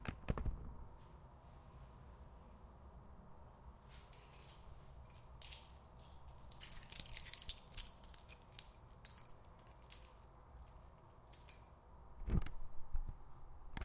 You can hear as someone is shaking its keys.
campus-upf, jingle, keys, metal-on-metal, UPF-CS14